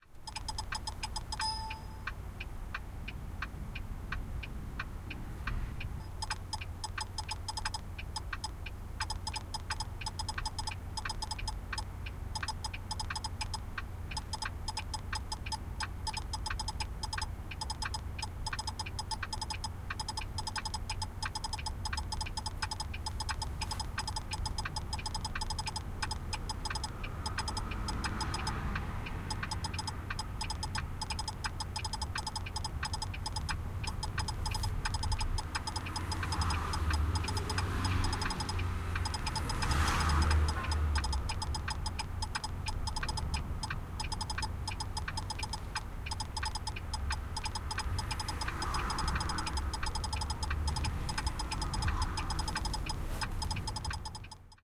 110819- windows vista crashed
19.08.2011: twentieth day of ethnographic research about truck drivers culture. Hamburg in Germany. Sound of crashed windows vista, blinker, passing by cars. Waiting for unload.
ambience, blinker, field-recording, windows-vista, truck-cab, polish, voice, truck